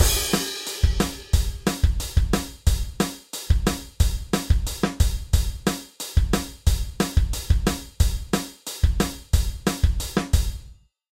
Real Drum Loop 2
Realistic drum stuff with some rock touch.
180, 90, bmp, drum, drums, jazz, loop, real, realistic, rock